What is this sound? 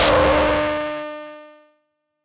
DRM syncussion german analog drum machine filtered thru metasonix modular filter.